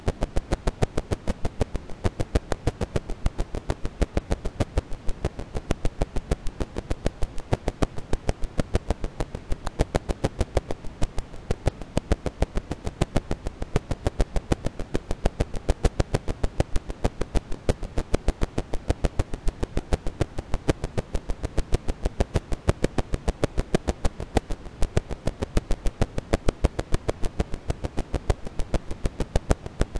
1920 33 rpm record crackle (high wear)
A record crackle I built in Audacity. The year and rpm are in the file name.
hiss, warp, wear